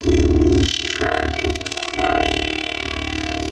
cloudcycle-voxfx.3
voice, robot, synthetic, fx